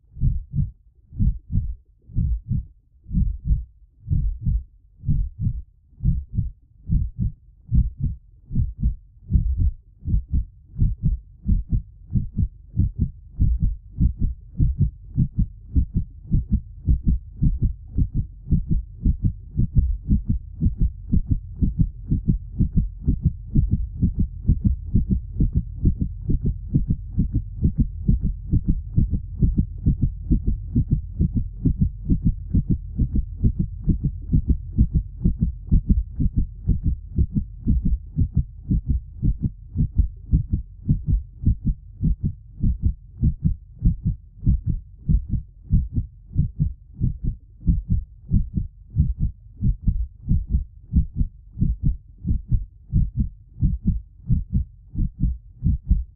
Heart beat.Fright.The increase and decrease in heart rate(6lrs)
The sound of a pulsating heart muscle or heart, with an increase and decrease in the intensity of the pulse, as with sudden stress. With concomitant blood pressure murmur. Created artificially. Hope this will be helpful to you. Enjoy it!
Please, share links to your work where this sound was used.
Note: audio quality is always better when downloaded.
afraid, beat, blood, body, cinematic, contractions, dramatic, fear, fright, heart, heart-beat, heartbeat, horror, human, loop, noise, palpitation, pulse, pump, pumping, regular, rhythm, scary, sounddesign, stethoscope, stress, thriller, thump, video